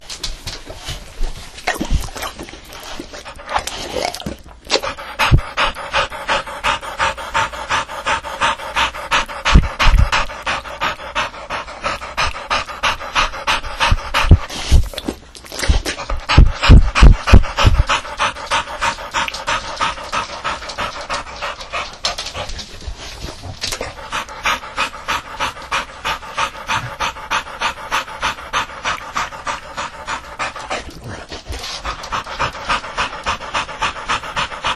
This is my Old Victorian Bulldogge Ruby panting after playing outside. She loves to run and gets winded! We would love to know how you use the sound. It was recorded with an Olympus Digital Voice Recorder VN-6200PC
dog, slobber, bulldog, breathe